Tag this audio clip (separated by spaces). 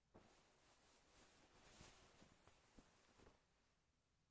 fabric friction cloth